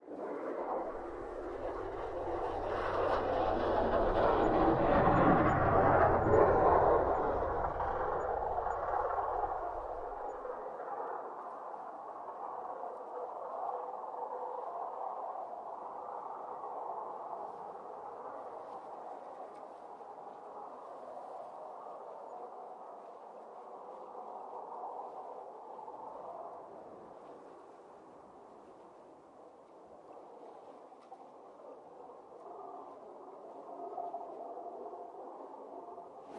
truck snow plow distant pass1
distant, pass, plow, snow, truck